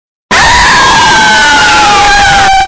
Jumpscare Scream
A loud and distorted jump-scare scream. Be careful with headphones or high volume...
horror, jump-scare, jumpscare, scare, scary, scream, screaming, shock, shocker, yell, yelling